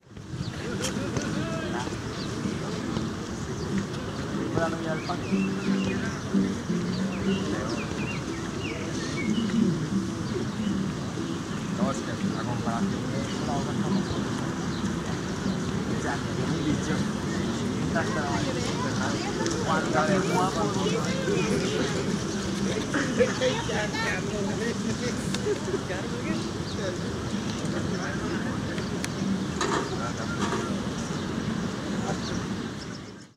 Set of recordings made for the postproduction of "Picnic", upcoming short movie by young argentinian film maker Vanvelvet.
walla, barcelona, mono, ambience, ciutadella-park, urban, exterior